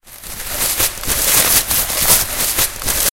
paper ripping
Sounds recorded by participants of the April 2013 workshop at Les Corts secondary school, Barcelona. This is a foley workshop, where participants record, edit and apply sounds to silent animations.
Ripping paper.